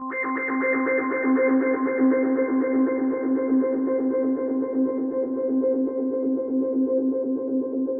arp slider-c5
trance slider arp with reverb. sounds cool! (basic version will be uploaded later {much later})
arp, synth, techno, trance